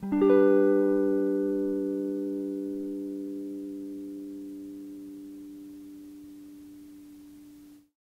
Tape El Guitar 18
Lo-fi tape samples at your disposal.
collab-2, el, guitar, Jordan-Mills, lo-fi, lofi, mojomills, tape, vintage